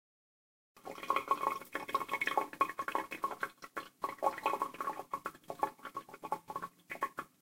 Water running down the sink
Water draining down the sink
draining-water, flowing-in-the-sink, sink, sink-water, water, water-flowing